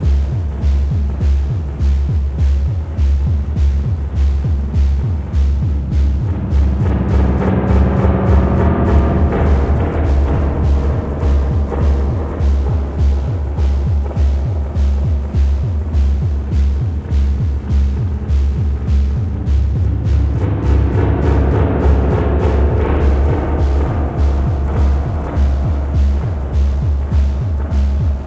Underwater DFAM
Part of assortment of sounds made with my modular synth and effects.
rhytmic, analog, dfam, moog, percussion